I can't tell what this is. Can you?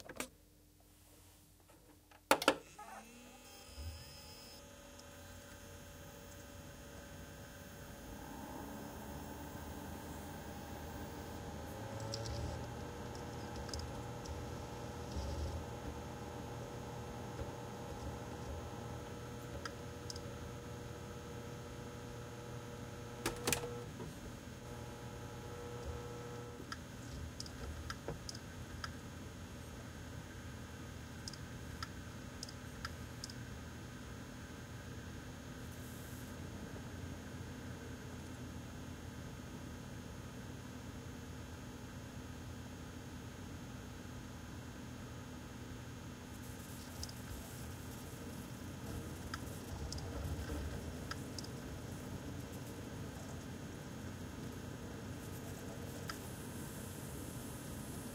foley,computer,pc,cpu,gpu,electric,fan,power,on,process,hum,buzz 01 M10
Trying to get some interesting sounds from the inside of my computer.